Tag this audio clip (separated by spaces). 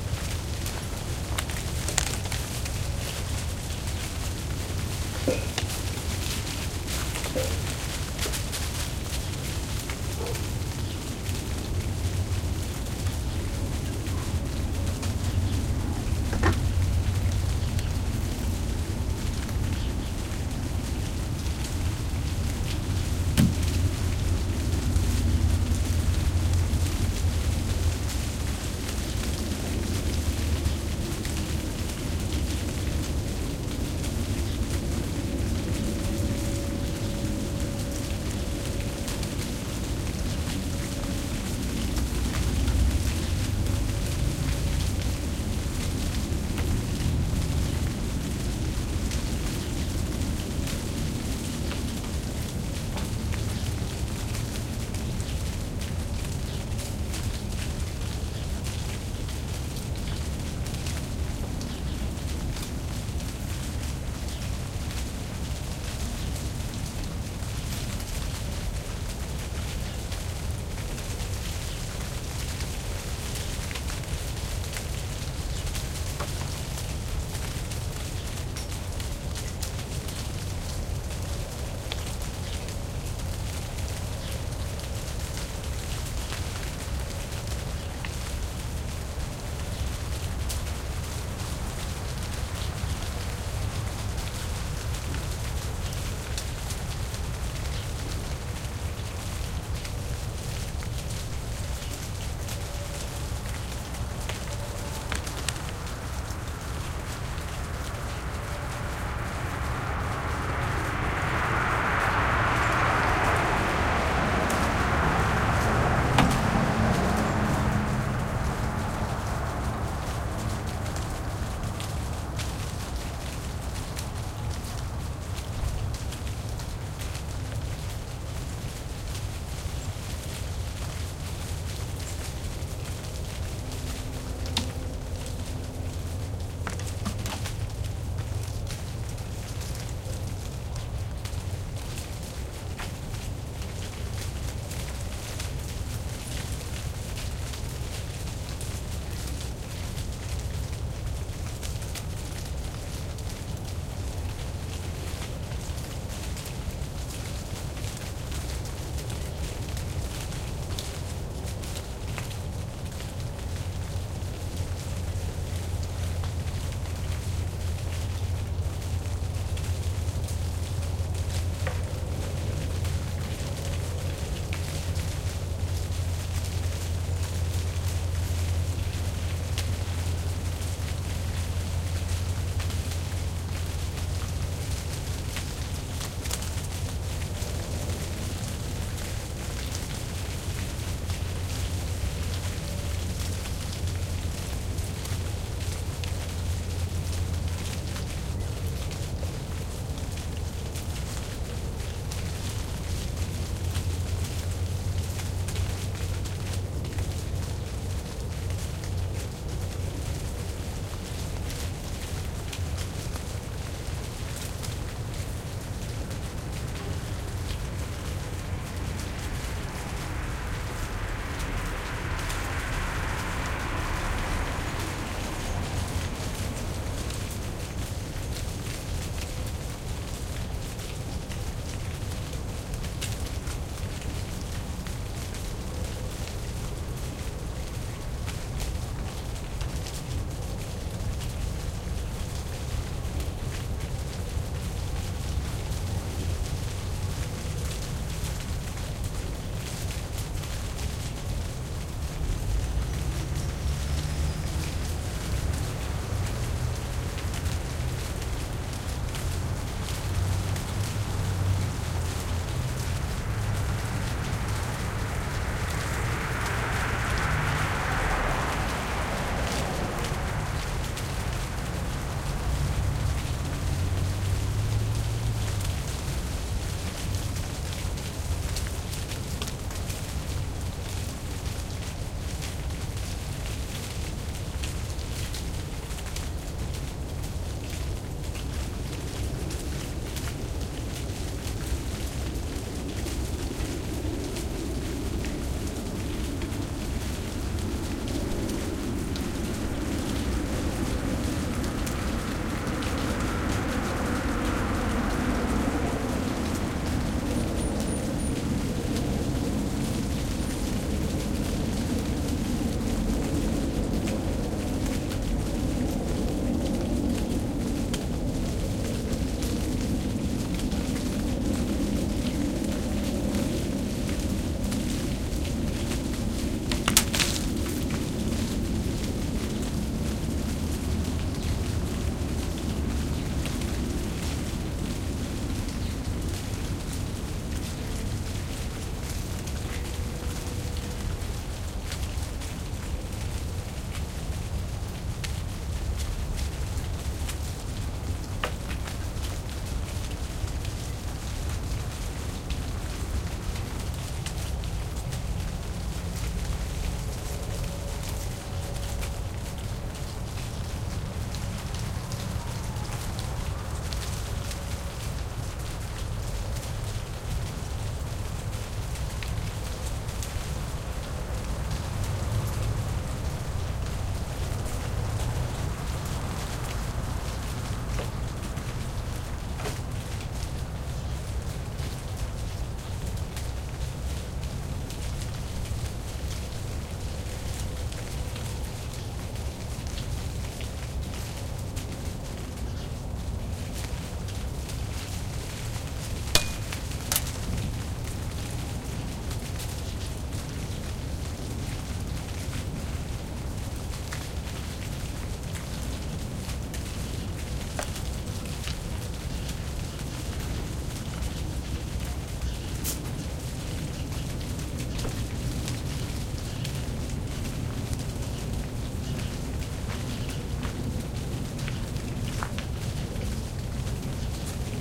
falling leaves